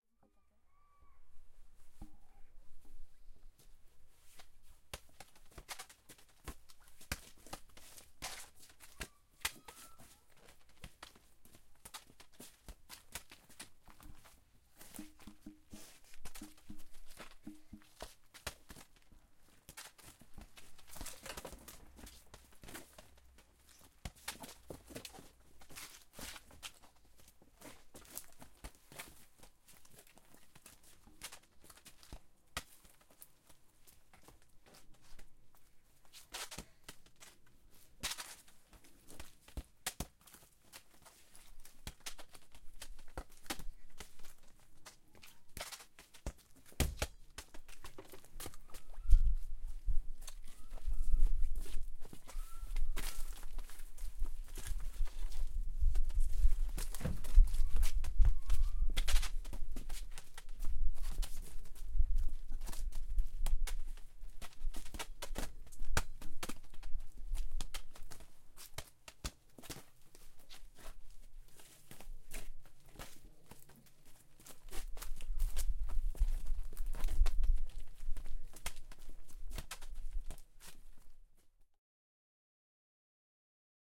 9. Atmosphere - kicking ball on concrete floor
Atmosphere, rubber ball, concrete flower, two people, outside, distant
Czech, Pansk, Panska